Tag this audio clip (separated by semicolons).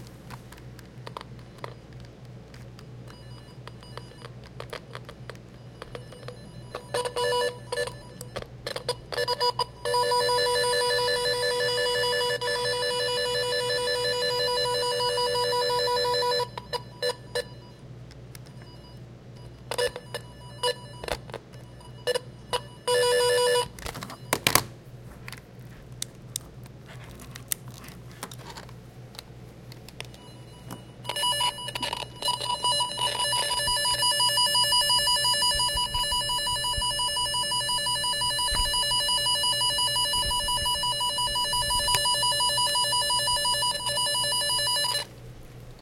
circuits; errors; machines; noises; rhythmic